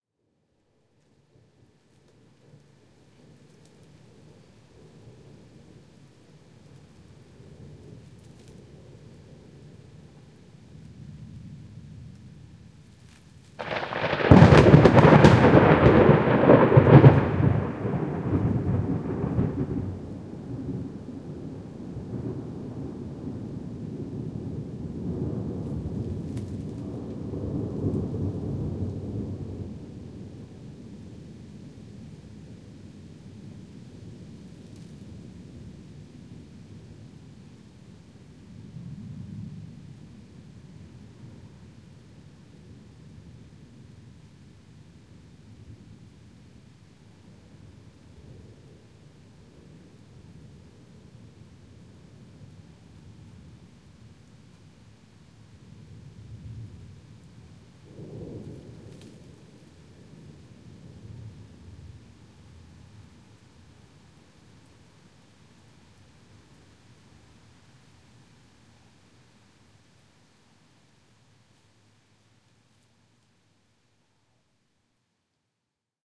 Thunder Clap - recorded with a Sony ECM-MS957 Stereo Mic and a Sony PCM-D50 recorder on June 26 2013 at 9:46PM in Owensboro Kentucky USA